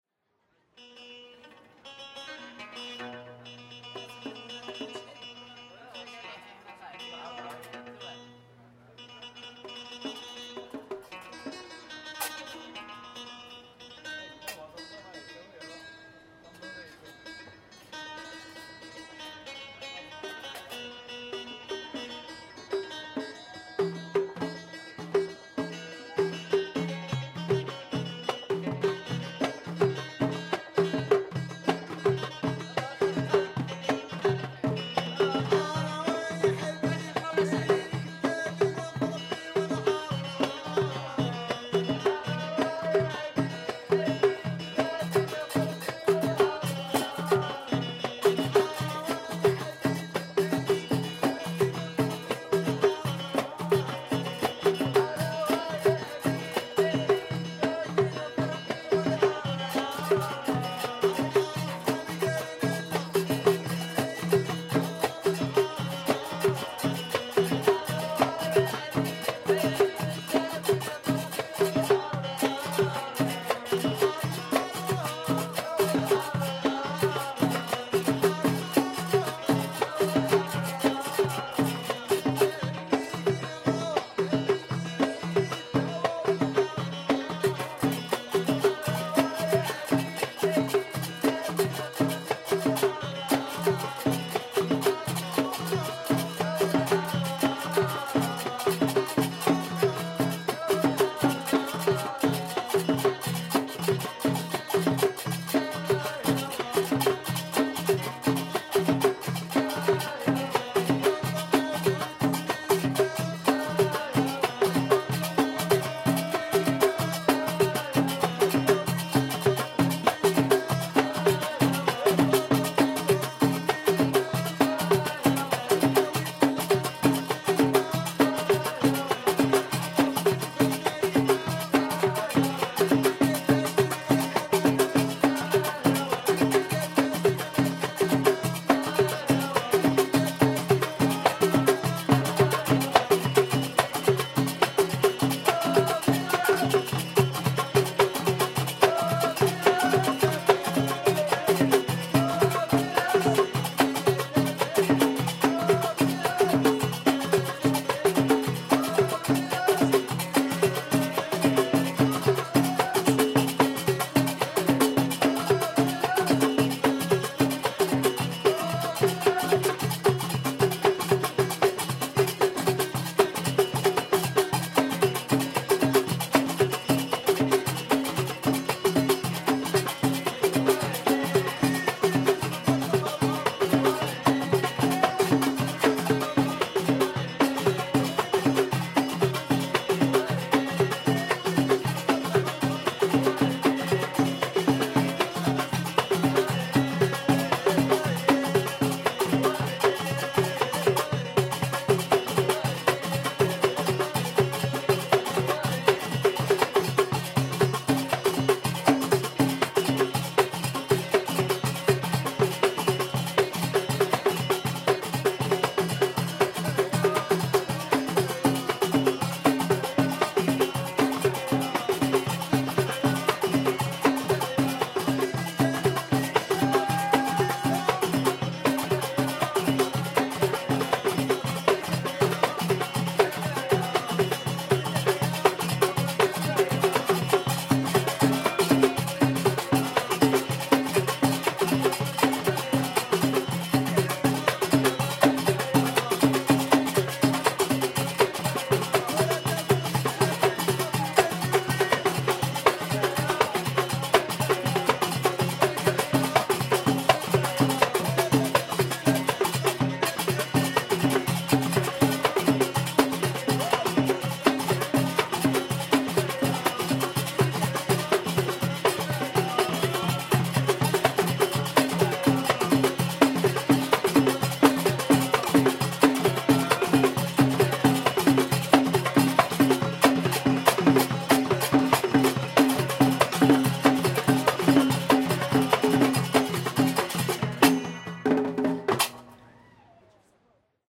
traditional moroccan music
Young guys performing traditional songs on roof-terras in Morocco, in a surf town near the beach
eastern, folk, folklore, improvisation, moroccan, music, performance, traditional